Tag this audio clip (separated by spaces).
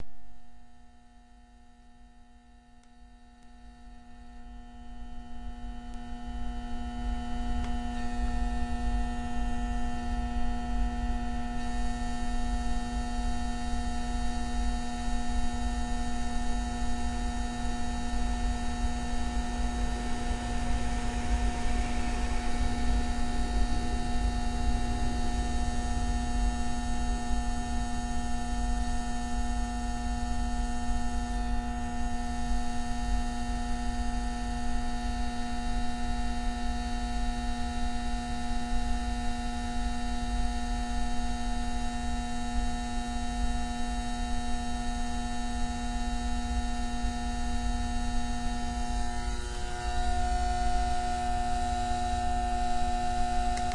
buzz electrical field-recording